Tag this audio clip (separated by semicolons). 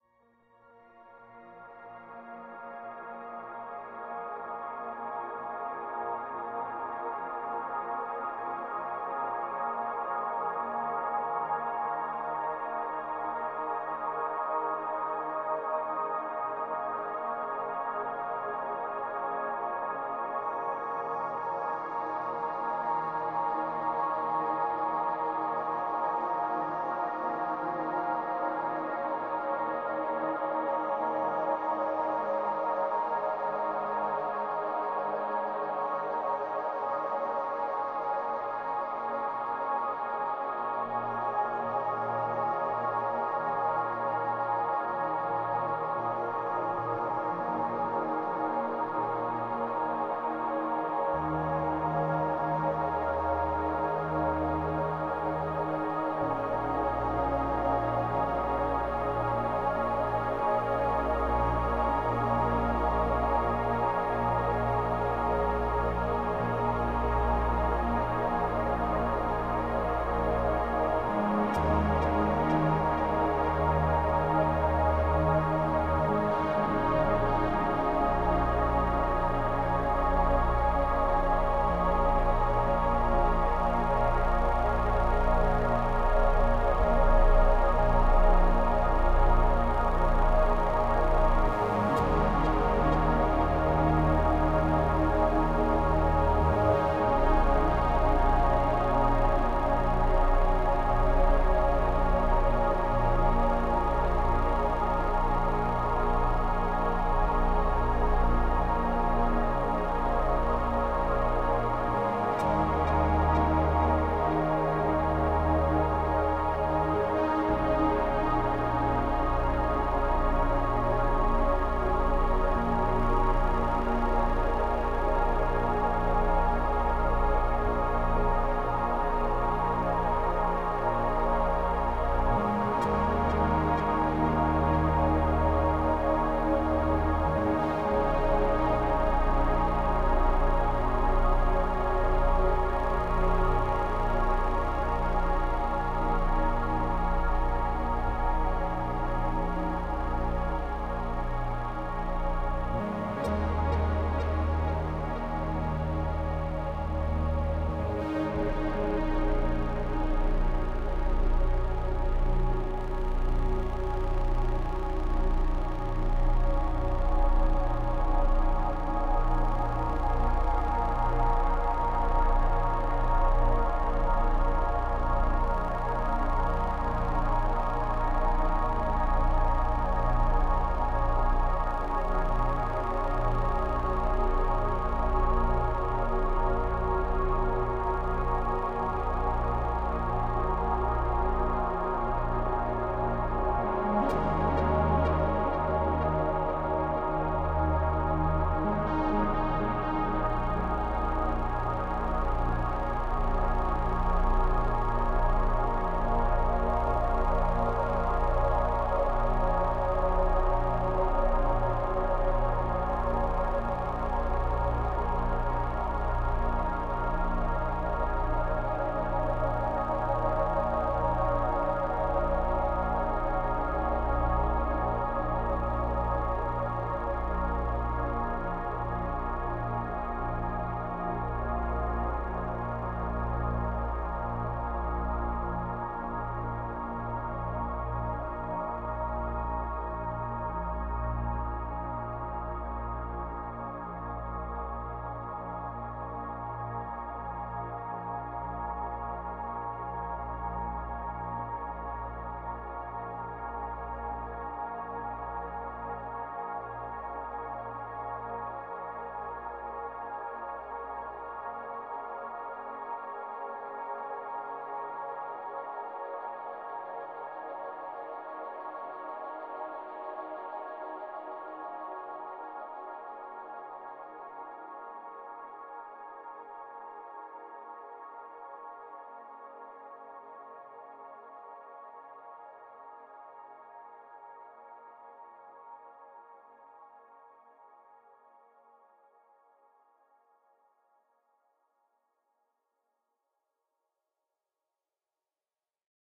ambient; atmosphere; atmospheric; classical; deep; drone; electronic; emotional; experimental; meditation; music; pad; relax; soundscape; space